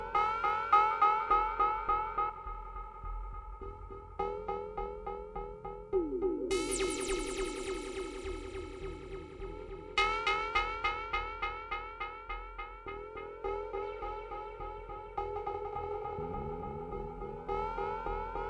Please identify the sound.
trip fx
acid, dub, fx